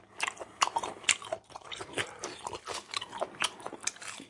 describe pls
Ulsanbear eatingrawfish0033
chewy, raw, food, mukbang, fish, eat, asian, eating